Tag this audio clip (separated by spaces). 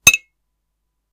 Annoying Clang Instrument